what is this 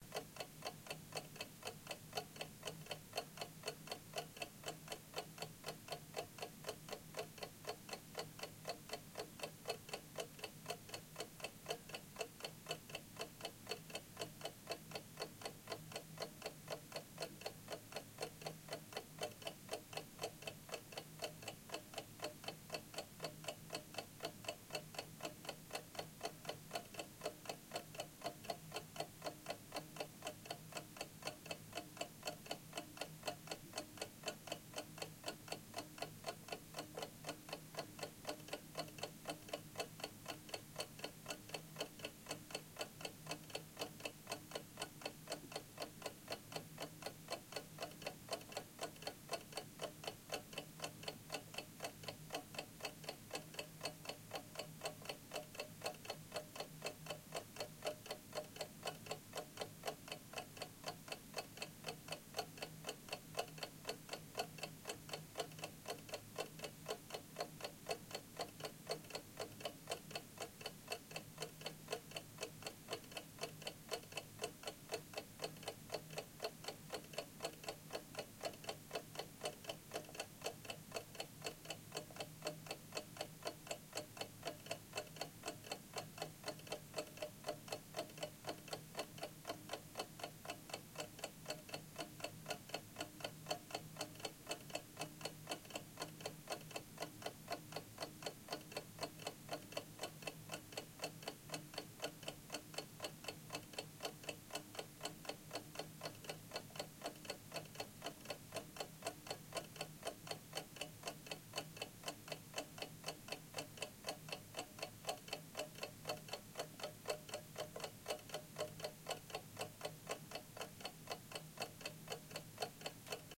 2 mins of a ticking clock one eerily quiet night in my house...